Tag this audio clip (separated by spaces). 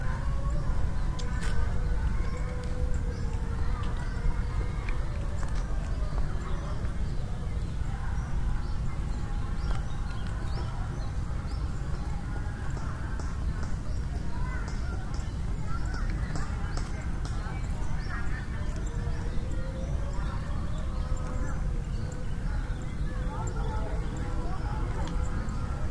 botanic indonesia field-recording birds garden